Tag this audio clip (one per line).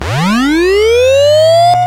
hard-disk
hard-drive